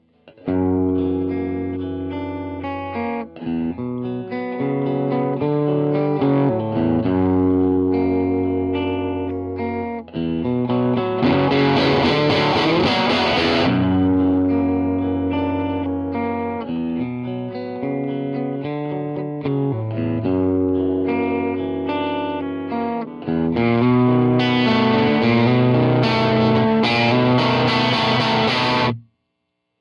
Tele Bridge Arpeggio Theme
Boost on, bright switch off showing dynamic range. Recorded with an SM57 into a Steinberg UR824 using cubase with no sound processing or eq'ing.
sample, guitar, amplifier